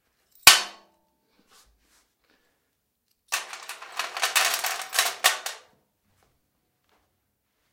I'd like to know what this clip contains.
different noises produced with the screws, nails, buts, etc in a (plastic) toolbox
tools, garage, mechanics, cabinet, toolcase